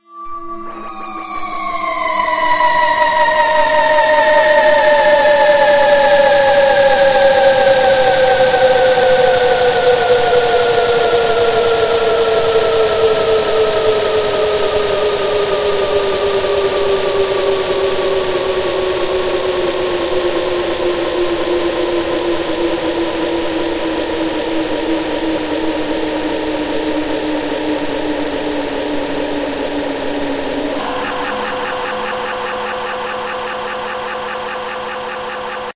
dub siren 11 1
A very long, hoover-like sustained pad. Made these FX with a custom effect synthesizer made with synthedit. oops.. this is the exact same as ds10... o well =/